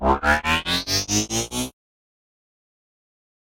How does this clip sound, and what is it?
Transformers transformation sound like, creating in serum